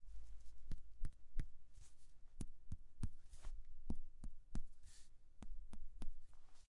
Percussion is a diagnostic procedure that helps determine density, position, and size of underlying body structures, done by firmly tapping the body surface with fingers to produce a sound.
medical, diagnostic, Percussion, procedure